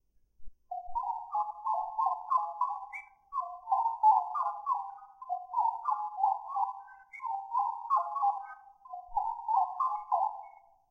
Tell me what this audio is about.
Bird1 Robot
This sound was originally a bird recording that was EQ'd to isolate the bird and remove background noise. Then, using various effects, the sound was edited to create this. Sounds like a robot similar to a Star Wars droid.
Bird, Effects